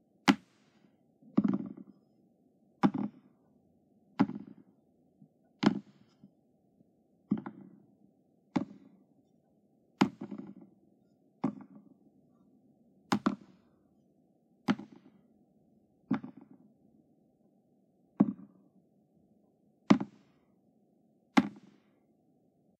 Object falling on wood
Various sounds of a small object landing on a wooden surface